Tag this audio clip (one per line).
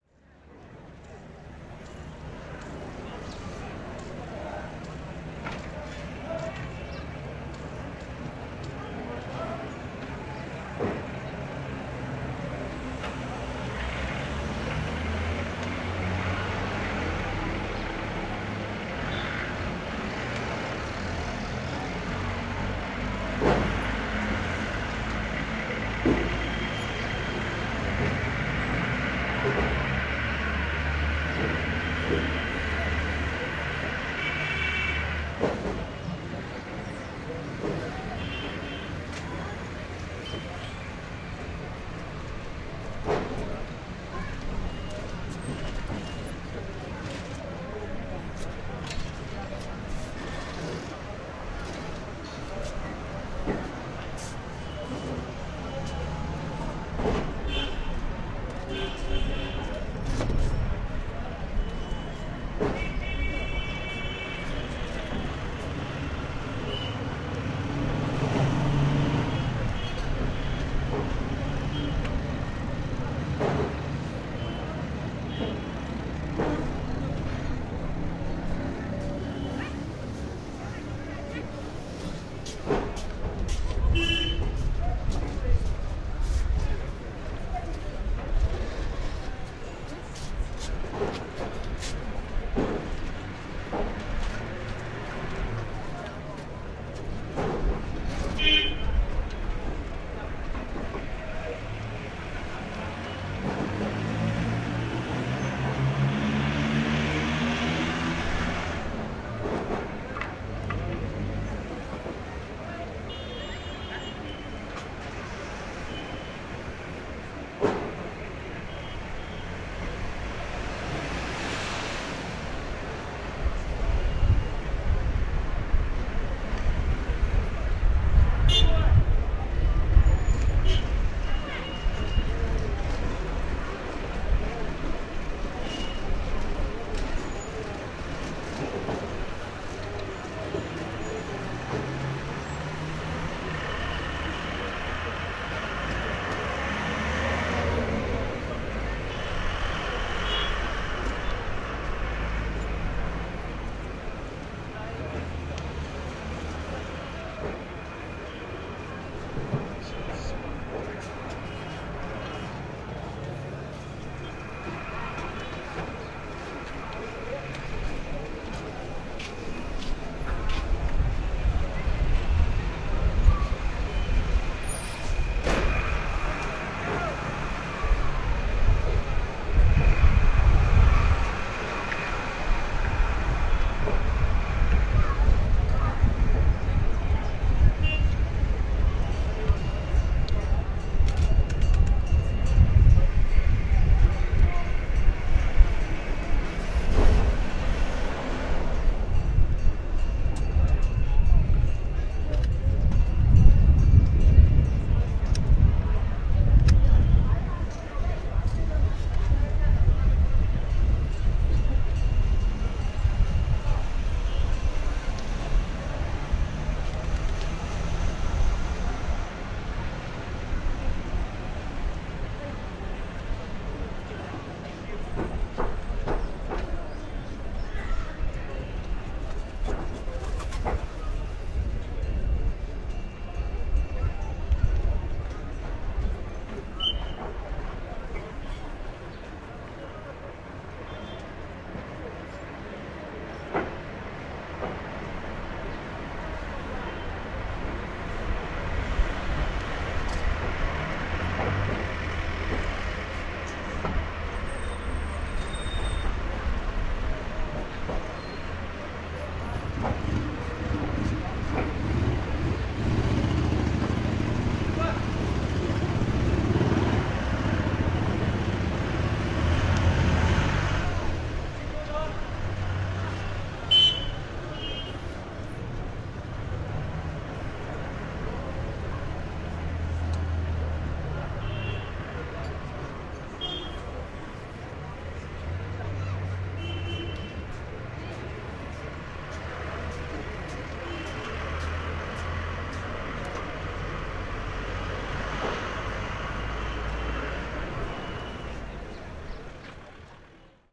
cafe
field-recording
india
leh
street
town